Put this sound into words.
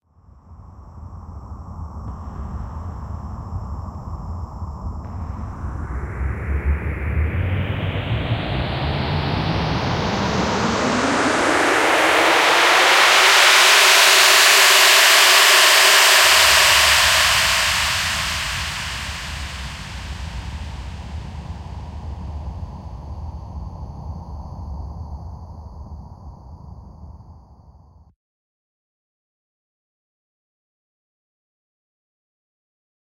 This starts of subtle low rumble into an intense abrasive thrust up sound, then back to a low rumble.